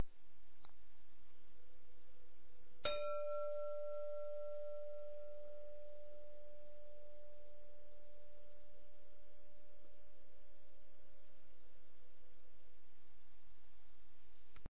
Striking glass bowl with the nail.
bowl, glass, nail, striking